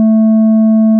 low A tone
generated A note
tone, a, note